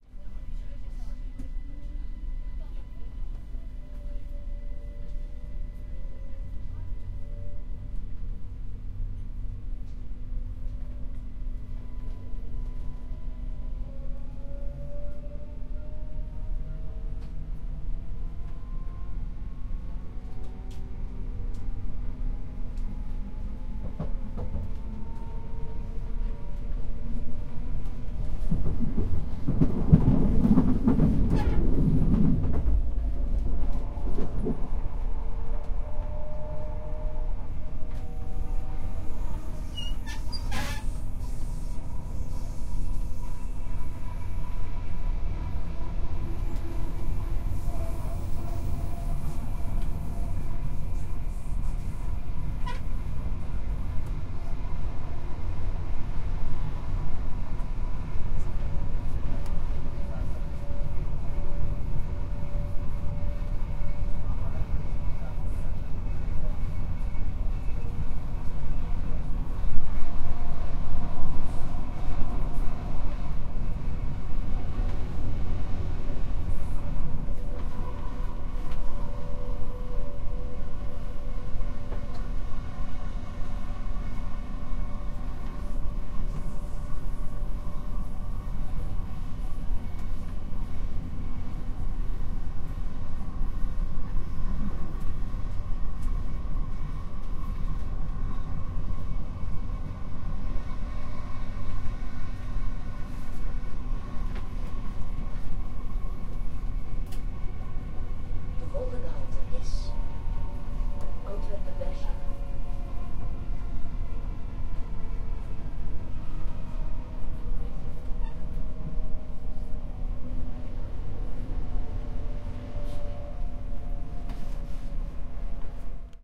Train interior Antwerp
passenger-train, express, interior, rail-road, iron, antwerp, locomotive